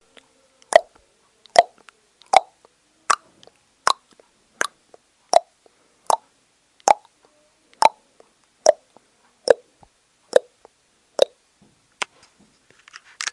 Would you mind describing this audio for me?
Me clicking my tongue with different pitches and volumes.